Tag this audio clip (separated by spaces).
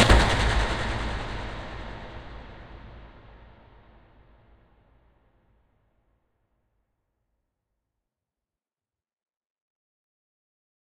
sfx effect fx sound